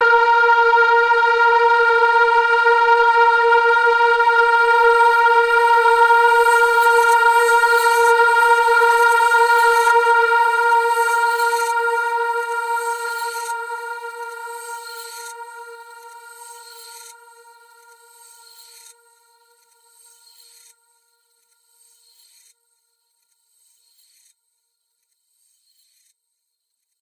A very dark and brooding multi-sampled synth pad. Evolving and spacey. Each file is named with the root note you should use in a sampler.
ambient, dark, granular, multi-sample, multisample, synth